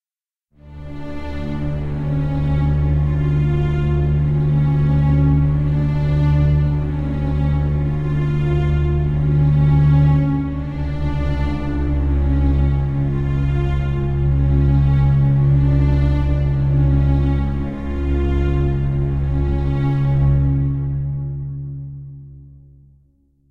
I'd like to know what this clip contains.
made with vst instruments
ambience, ambient, atmosphere, background, background-sound, cinematic, dark, deep, drama, dramatic, drone, film, hollywood, horror, mood, movie, music, pad, scary, sci-fi, soundscape, space, spooky, suspense, thiller, thrill, trailer